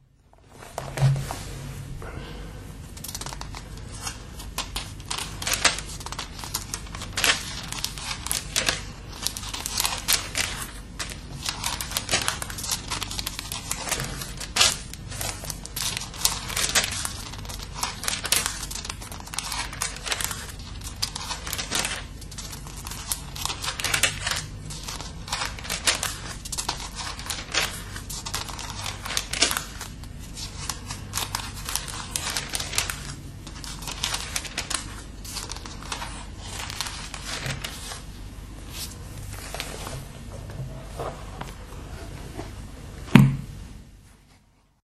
Turning the pages of the book 1st Kings in the bible (dutch translation) the church has given my father in 1942. A few years later my father lost his religion. I haven't found it yet.
book, paper, turning-pages